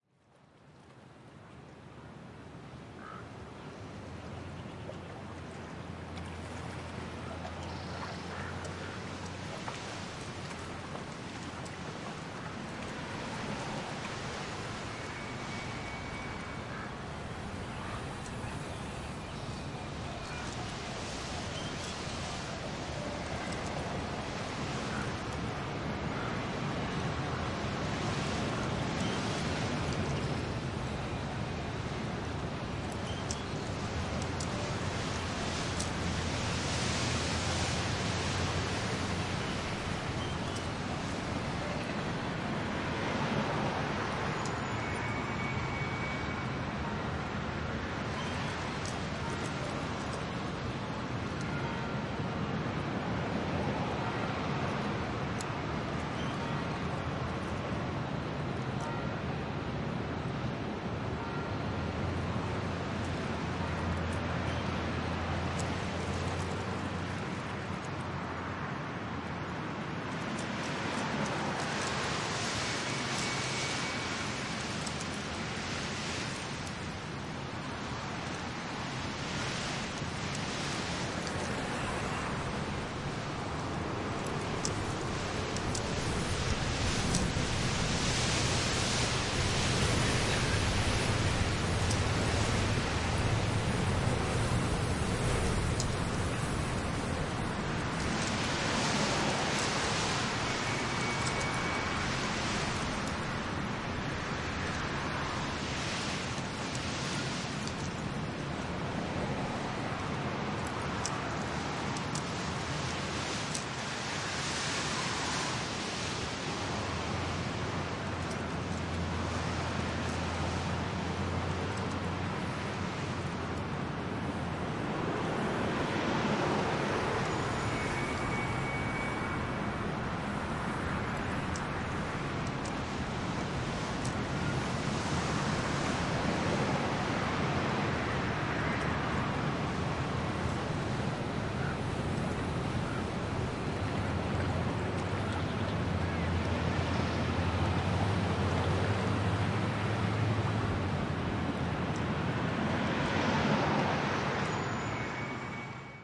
city ambience
I love good sound.
amb, ambience, church, city, fiel, field-recording, water, weather, wind